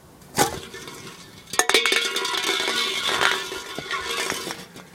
Rolling Can 15
Sounds made by rolling cans of various sizes and types along a concrete surface.
aluminium, can, roll, rolling, steel, tin, tin-can